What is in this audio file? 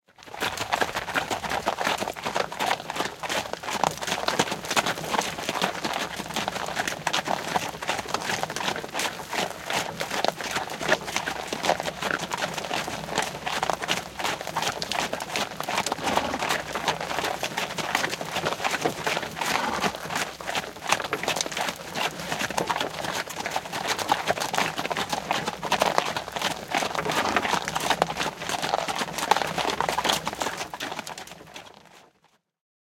Horsewagon driving steady ext
Horsewagon from 18th century
driving, exterior, horse, steady, wagon